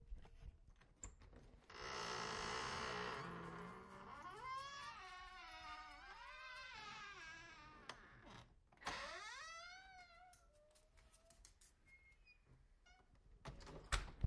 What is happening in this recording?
creaking door 1 slow
My friends front door. He keeps it loud on purpose because he has teenagers who sneak in and out at night.
creaking, door, hinge